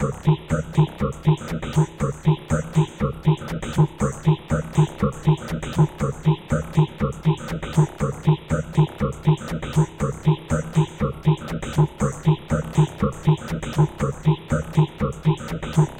Copyc4t mello00+rhythm00A
120bpm, image-to-sound, picture-to-sound, loopable, seamless-loop, loop, dare-26, Reason